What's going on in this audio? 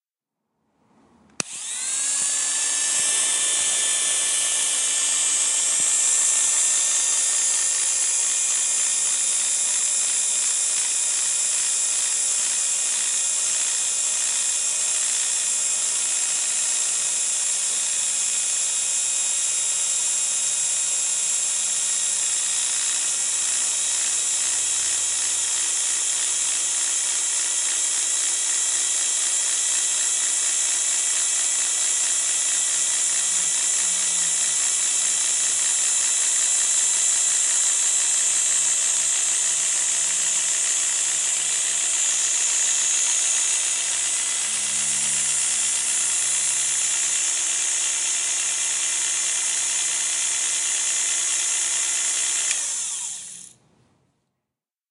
Oster76 barber clippers.